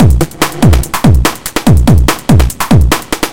Hardbass
Hardstyle
Loops
140 BPM
140, BPM, Hardbass, Hardstyle, Loops